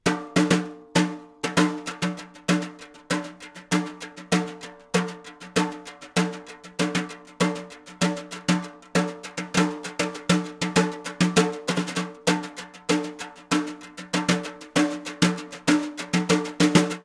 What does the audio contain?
A snare drum played with brush sticks by my friend Mark Hage. Unprocessed, some room ambience but not too much.
drum, groove, snare, brush